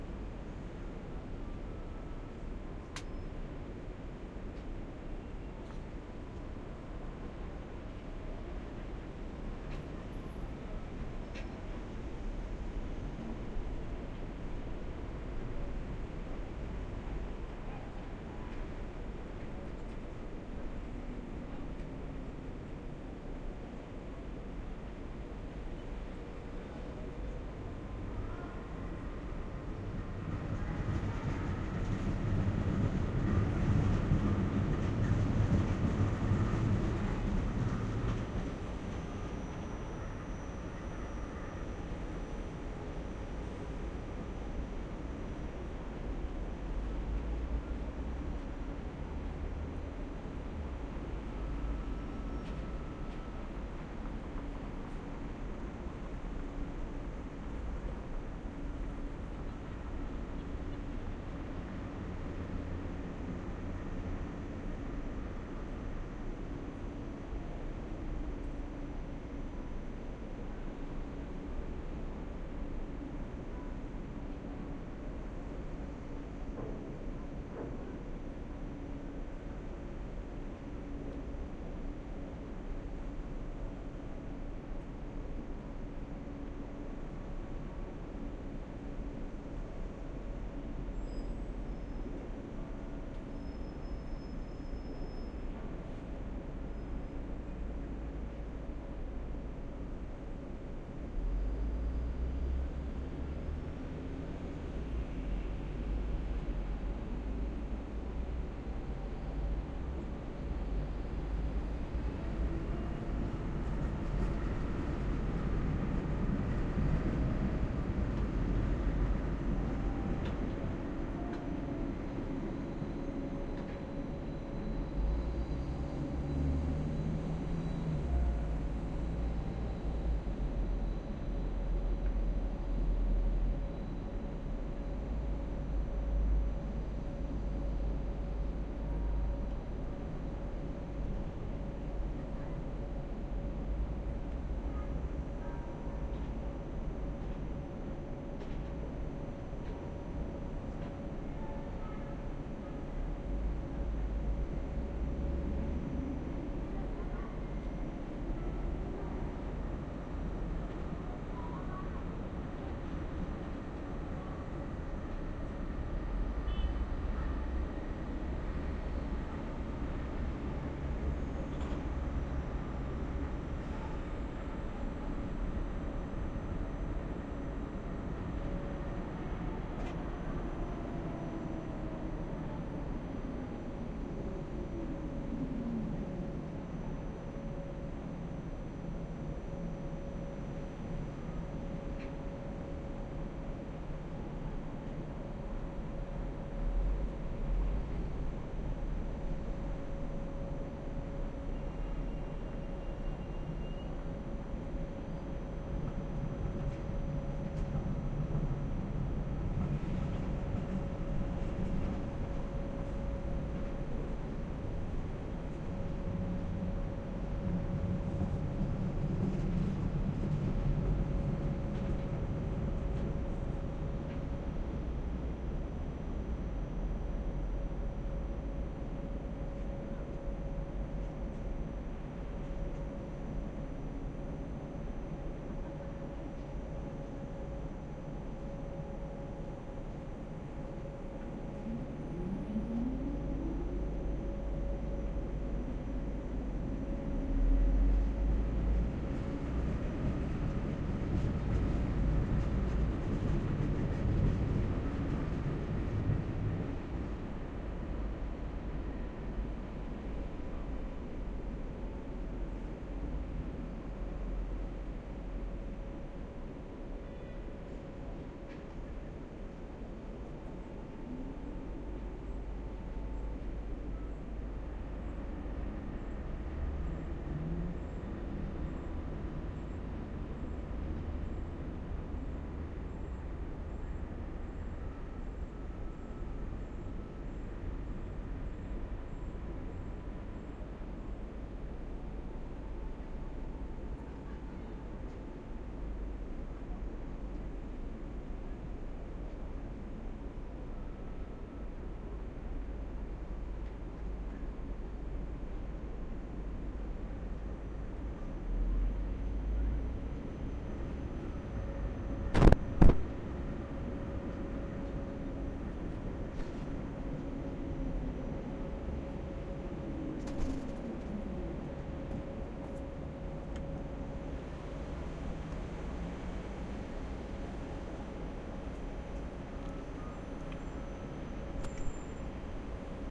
SKYLINE Jecklin disk 03-01
Recording from top floor clarion hotel oslo. Recording from the terrace suite of the hotel and i have been useing two omni rode mikrofones on a jecklin disk. To this recording there is a similar recording in ms, useing bothe will creating a nice atmospher for surround ms in front and jecklin in rear.
ambient, ambience, general-noise, soundscape, city, atmosphere, field-recording